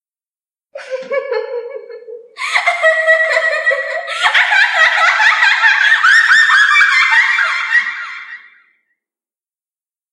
S/O to RaspberryTickle!
Laughing Yandere Remastered & NO hiss
[2022-11-13].
no strings attached, credit is NOT necessary 💙